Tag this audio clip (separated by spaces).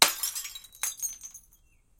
bottle-breaking liquid-filled bottle-smash